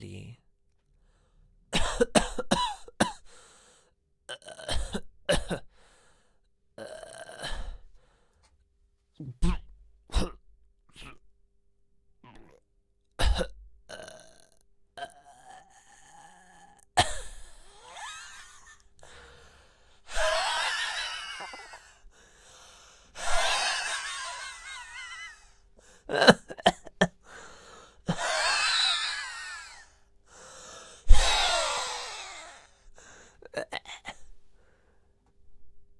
Shakespeares play a death in the play
This sound I recorded , by melodramatically making death sounds into the microphone. I did heavy breathing, wheezy breaths like an asthmatic person or a smoker and I did short pronounced grunts as-well, as if I was getting stabbed. The only editing I did was increase the volume of the track because the original recording was too soft to fully hear.
over, top, Shakespeare, death-Long, death, Melodramatic